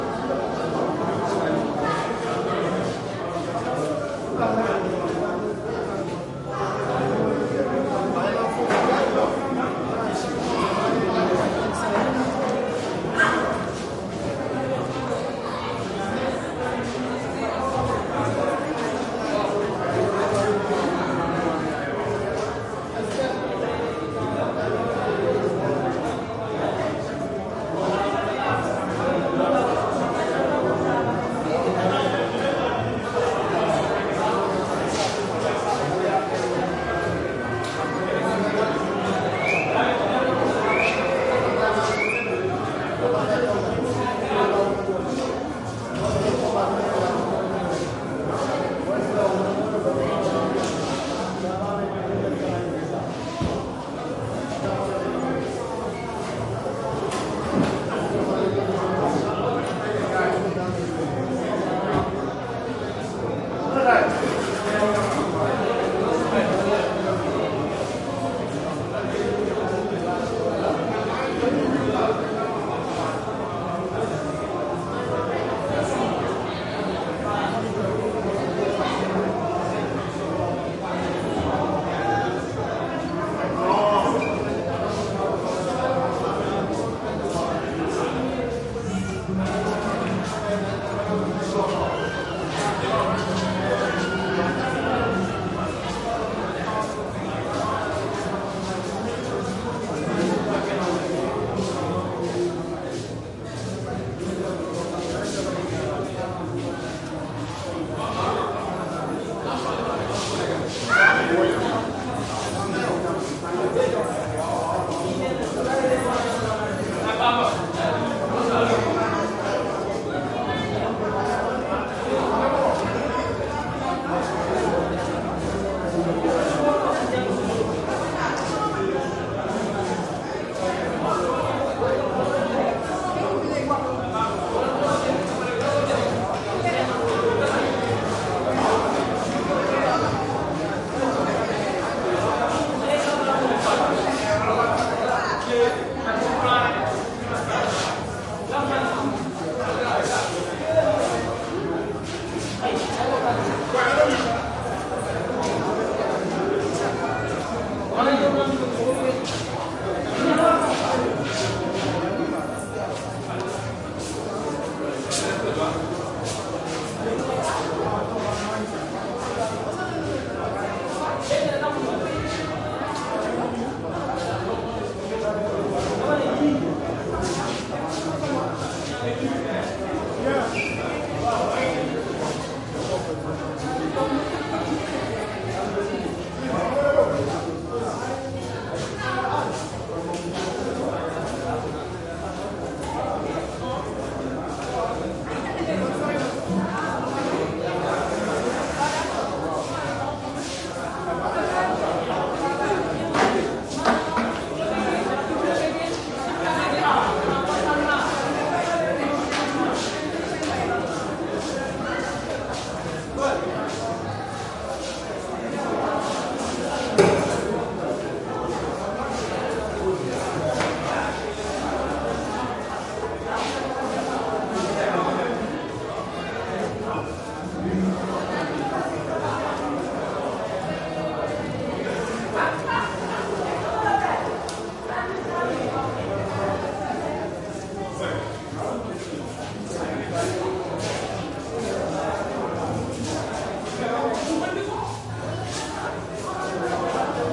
crowd int medium school busy activity flip flops boomy echo Dakar, Senegal, Africa
medium, Senegal, Africa, busy, int, crowd, school